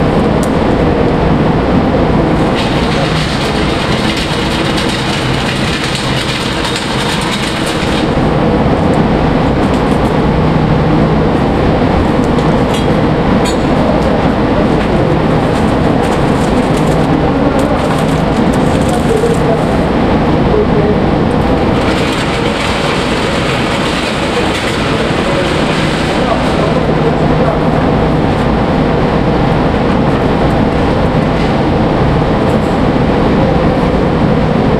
GunRange Mega13
Retracting target at an indoor gun shooting range